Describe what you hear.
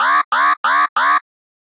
1 alarm short d
4 short alarm blasts. Model 1